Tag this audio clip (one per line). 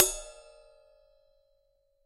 cymbal
perc
percussion
ride